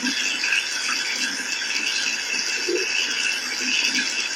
Selfmade record sounds @ Home and edit with WaveLab6